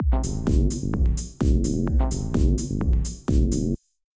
A 2 bar minimal house loop at 128 BPM I made which include some samples made by Msec. Enjoy!